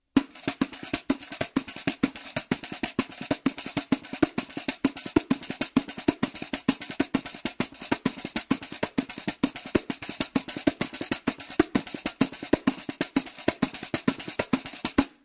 Playing a samba rhythm on different brazilian hand drums, so-called “pandeiros”, in my living room. Marantz PMD 571, Vivanco EM35.
brazil, drum, groove, loop, loopable, pandeiro, pattern, percussion, rhythm, samba